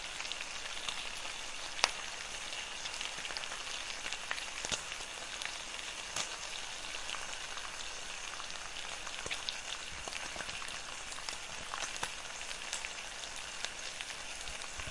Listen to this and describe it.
BACON FRYING SIZZLE POP
A close up stereo recording of bacon sizzling in the pan. Caution, may make your mouth water. Recorded in Colorado, June of 2012 using a Tascam DR07 on the counter next to the frying pan. The bacon was very tasty also.